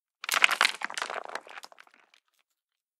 S O 1 Rocks Falling 02
Sound of small rocks hitting the ground. This is a mono one-shot.
Impact, Stones, Foley, Rocks, Falling, Drop